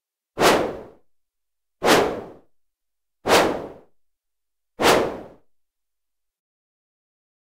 f Synth Whoosh 03
Swing stick whooshes whoosh swoosh
stick, Swing, whoosh, whooshes